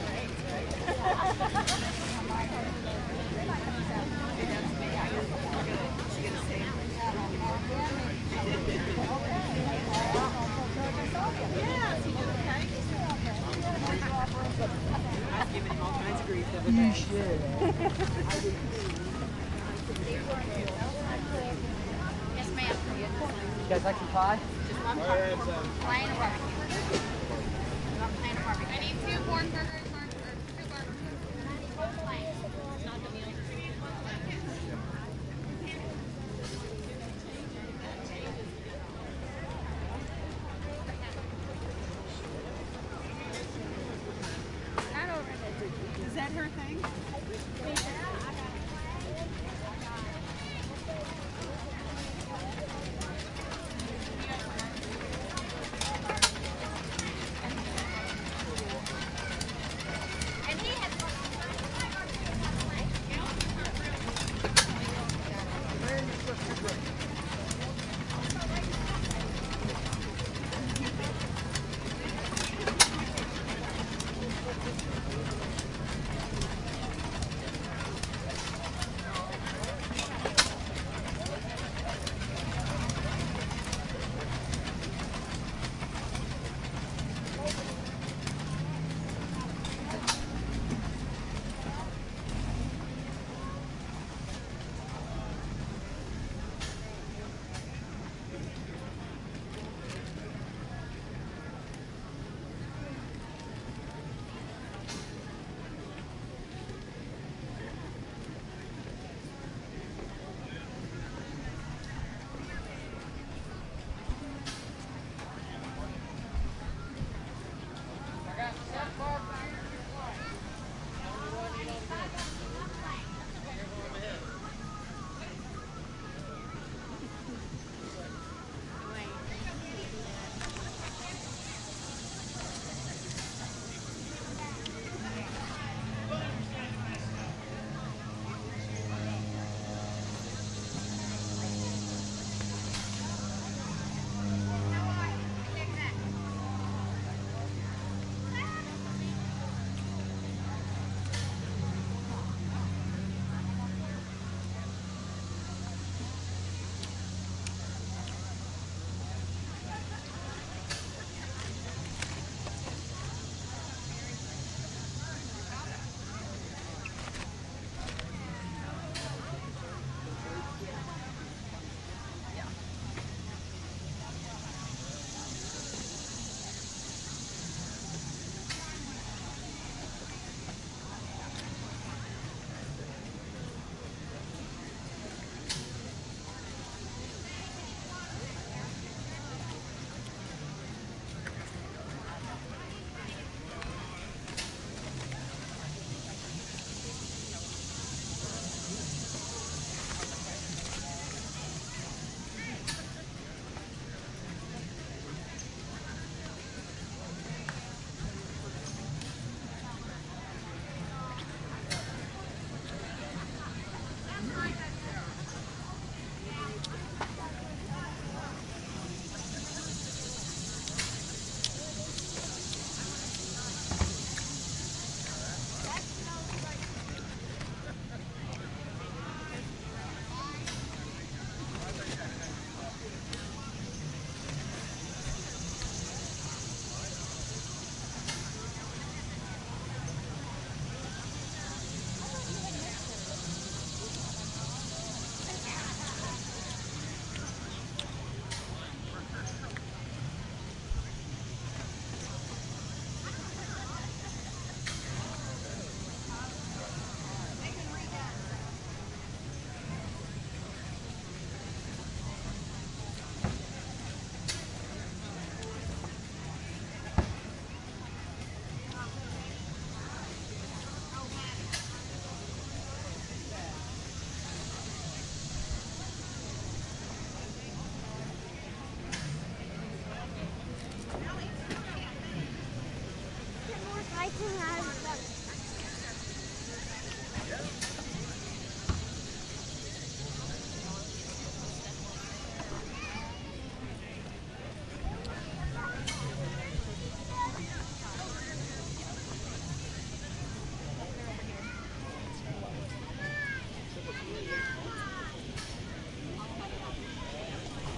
MidwestCountyFairJuly2016Soundscape
A soundscape of a typical Midwestern County fair at the height of the Summer insect season in July of 2016. Maybe this soundscape will enable you to think back to the innocence of your childhood.
Recording was made with the incredibly durable and fantastic Beyerdynamic ME58 and the Marantz PMD661.
county-fair, field-recording, insects, summer